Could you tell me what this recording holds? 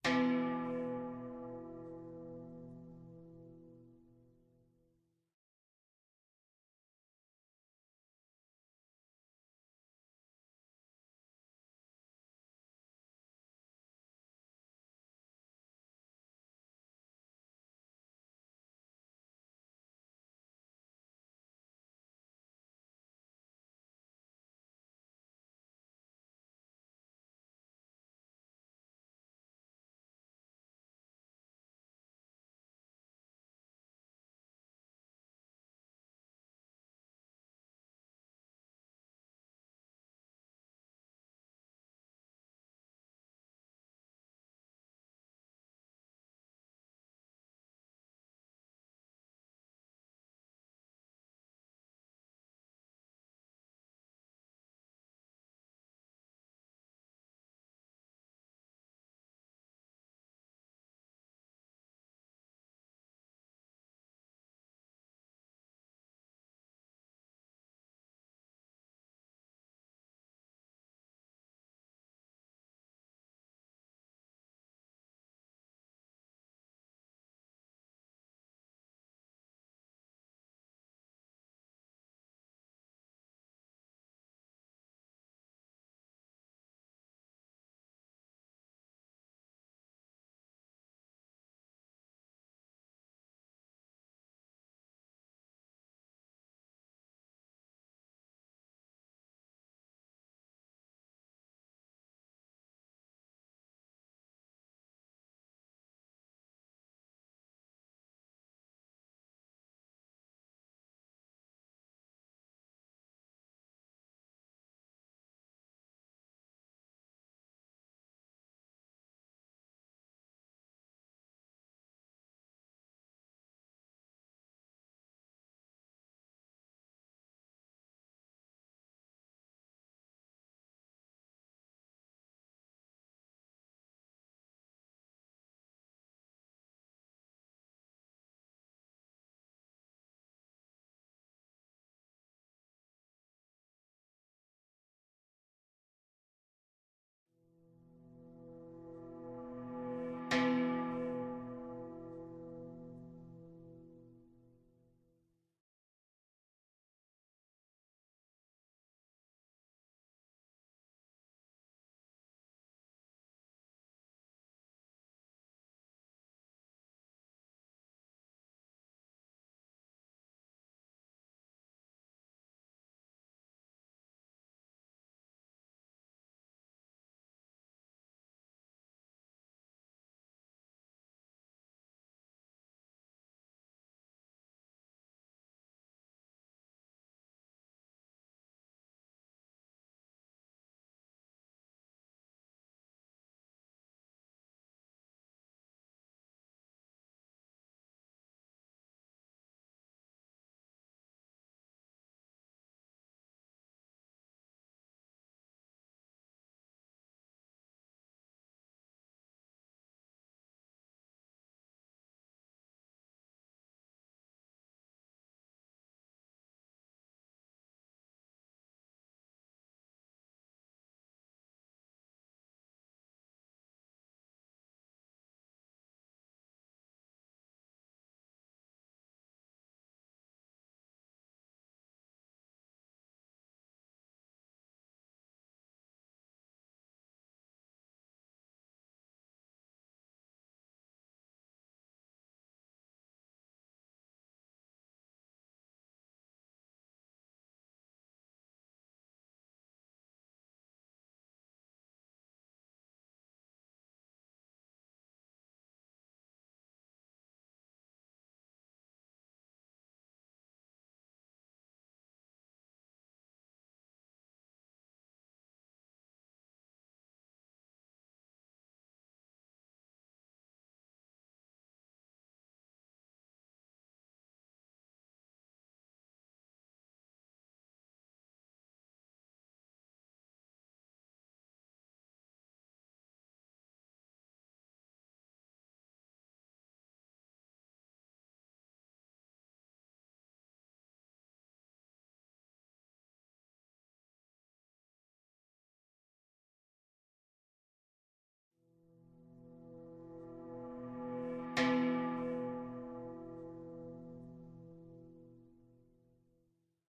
Five Minute Meditation Timer
Five-minute meditation timer. There is a tone at the beginning, the halfway point, and at the end.
five-minutes, interval, meditation, meditation-timer, silence, timer